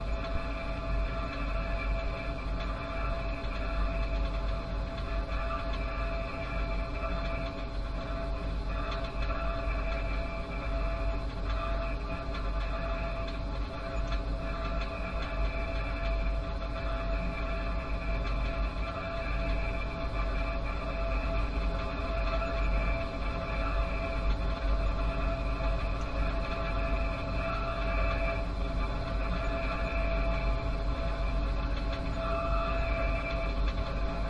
Tinnito - drone - eau tuyau low - C411
Drone recorded by playing with waterpipe and contact microphone.
Zoom F4 + AKG C411
water-pipe, drone, contact-microphone